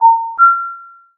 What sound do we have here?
short FM generated tones with a percussive envelope